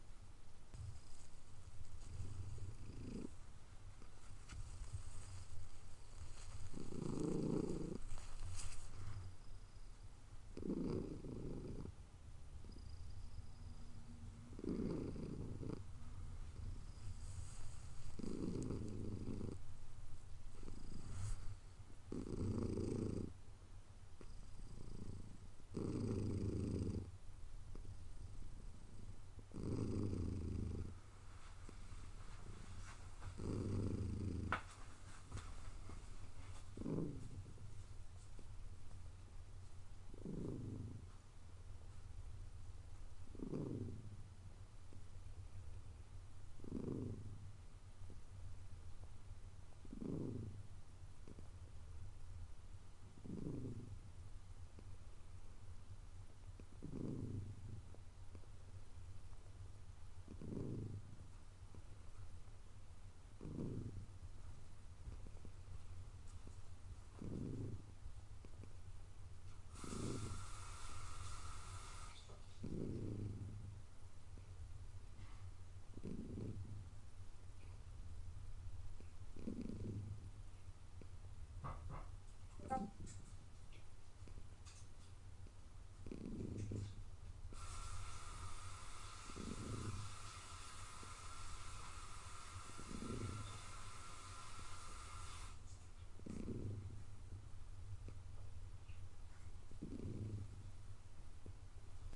purring
Rough Ratings
Sound Quality: 3 Volume: 3
Recorded at 22/03/2020 20:44:36
purr, domestic, animal, cat, pets, pet, purring, animals, cats